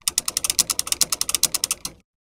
Bycicle grears in reverse
Bicycle gears turning in reverse recorded with a Zoom H6
gear, Bicycle, reverse, OWI, gears